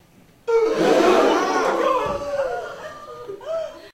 Audience Gasping
Recorded with Sony HXR-MC50U Camcorder with an audience of about 40.